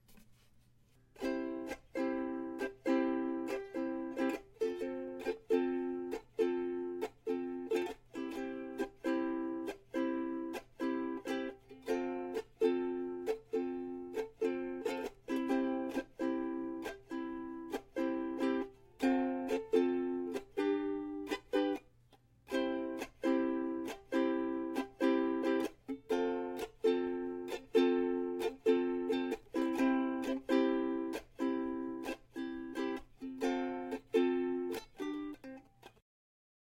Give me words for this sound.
Strumming some chords (C F & G) on my Ukulele
chords, strum, ukulele, stereo, uke, strumming